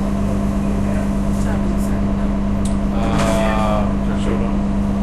Recorded during a 12 hour work day. On the bus, people mumbling.
bus, field-recording, public, transportation